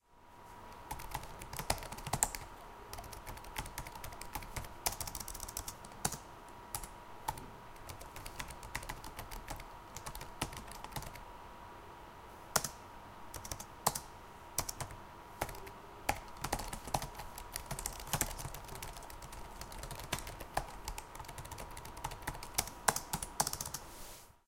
Someone is using a laptop keyboard. The sound of the laptop is perceived as a background sound. Sound Recorded using a Zoom H2. Audacity software used by normalize and introduce fade-in/fade-out in the sound.
keyboarD, keys, laptop, machine, UPFCS12, write